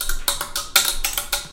Ceiling Fan Wire Hanger 1
An extended wire hanger rapping against a ceiling fan
Ceiling-Fan-Wire-Hanger, Ceiling-Fan, Wire-Hanger